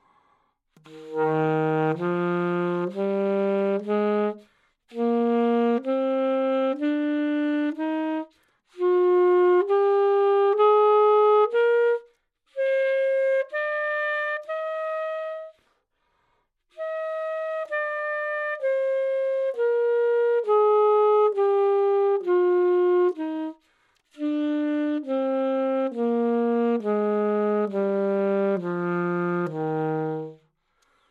Sax Alto - D# Major
Part of the Good-sounds dataset of monophonic instrumental sounds.
instrument::sax_alto
note::D#
good-sounds-id::6796
mode::major
alto, DsharpMajor, good-sounds, neumann-U87, sax, scale